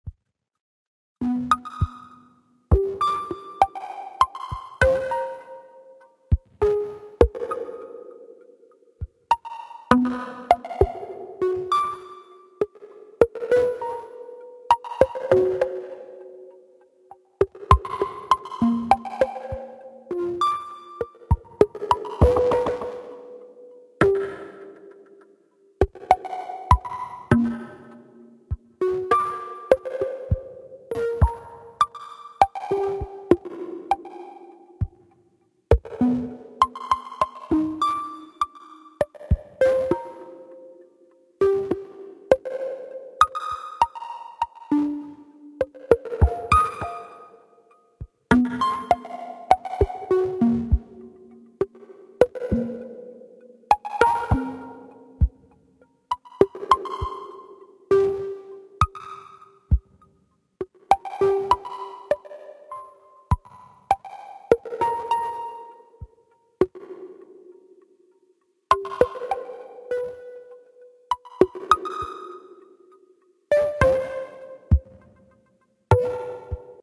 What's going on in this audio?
make me a song 1 50 bpm
long fractal melodic percussive phrase
1, make, song